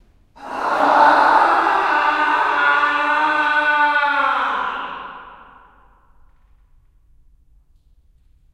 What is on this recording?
Scream aaa-1
Out of the series of some weird screams made in the basement of the Utrecht School of The Arts, Hilversum, Netherlands. Made with Rode NT4 Stereo Mic + Zoom H4.
darkness, death, screaming, weird, angry, painfull, yelling, funny, horror, fear, disturbing, pain, anger, yell, scream